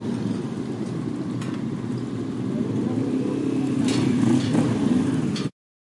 Motorbike Rev and Gate Chink
Motorbikes, Warm-Up